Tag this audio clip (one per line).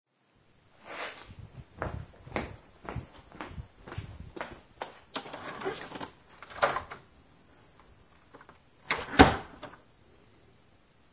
door
opening